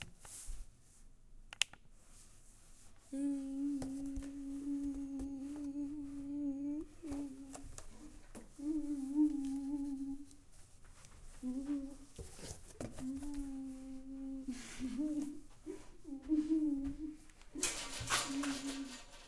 MySounds GWAEtoy hum2
field, recording, TCR